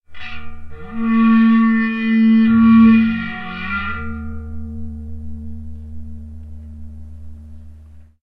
Corto Grave
bohemia glass glasses wine flute violin jangle tinkle clank cling clang clink chink ring